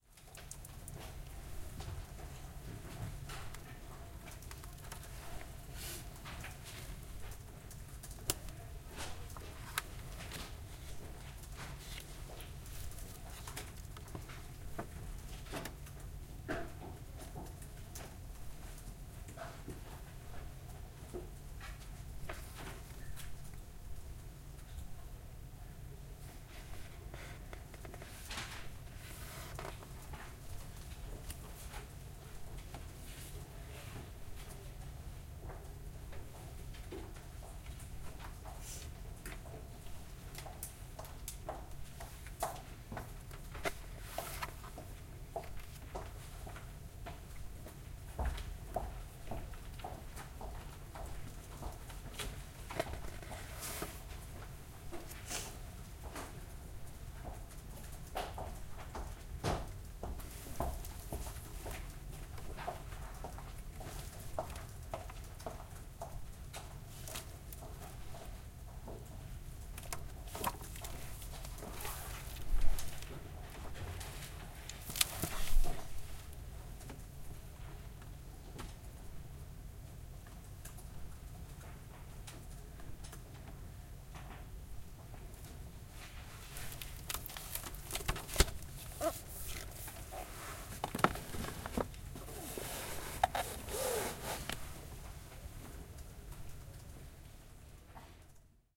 Field recording in the Art History section of the Main Library. Recorded 4 December, 2012 in stereo on Zoom H4N with windscreen.